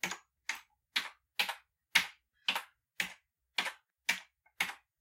Old keyboard
Recording of an old computer keyboard with space between each key press.